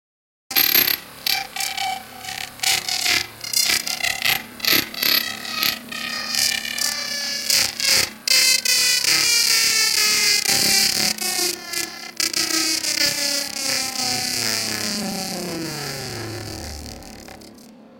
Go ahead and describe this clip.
biketire design 09
one of the designs made from a source recording of objects being pressed against a spinning bike tire.
Check out the rest of the pack for other sounds made from the bike tire source recording
bike, s-layer, field-recording, rubber-scratch, spinning, tire, SD702, abelton, reaper, scrape, processed-sound